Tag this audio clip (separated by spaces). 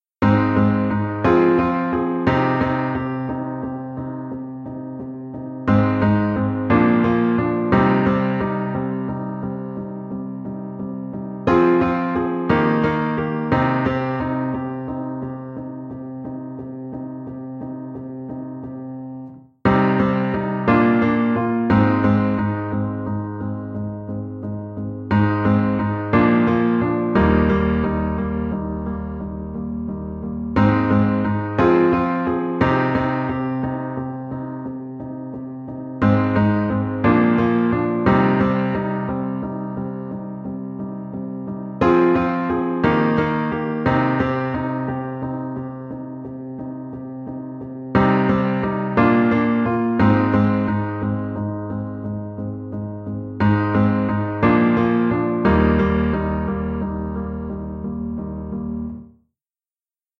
Minor chord Keys Piano sad